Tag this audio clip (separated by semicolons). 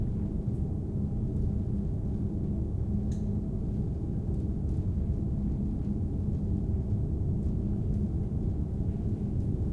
air; ambience; ambient; atmosphere; drone; field-recording; installation; int; noise; system; ventilation